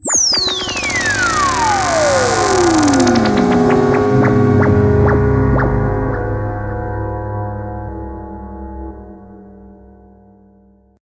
supernova fx3a
sampled from supernova2 synth with hardware effect processing chain.
fx, synth